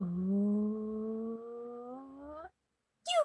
Estornudo Peludito
Sneezing of a monster
monster, sneezing, sneeze